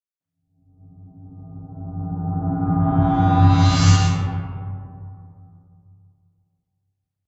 A metallic transition
cinematic, effect, metallic, transition